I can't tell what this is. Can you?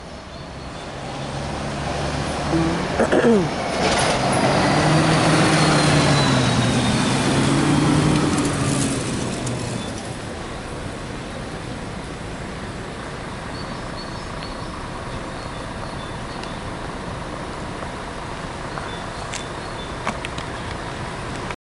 Street Noise in the city 2
Noise recorded in Łódź, Poland
It's not reminded by any law, but please, make me that satisfaction ;)
cars, poland, city, street, trees, noise, birds